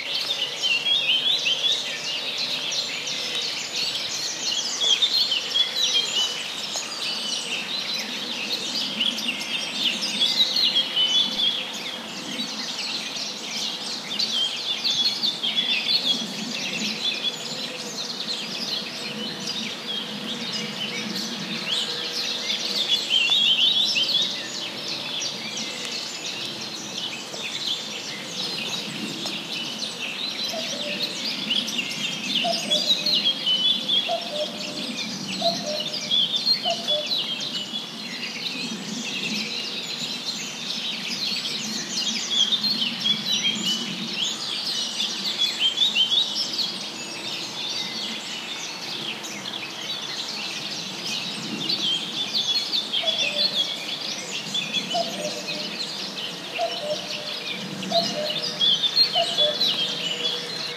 Birds in the wood
lots of birds in an european wood, made out of two mono-signals from an iphone 6, some slight outdoor-reverb added, but nearly not noticable ;-)